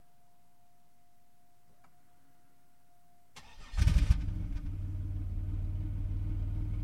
car start muffler 2
this is a recording of a 2000 Buick Lesabre being started at the muffler.
car, drive, engine, idle, ignition, motor, start, starting, vehicle